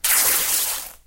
tearing a cloth01
horror, cloth, tear, clothes, incident, tearing